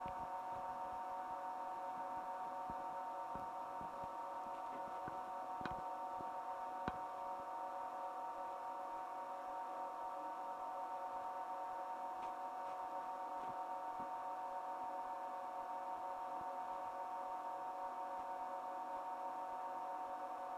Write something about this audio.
The sound of a printer.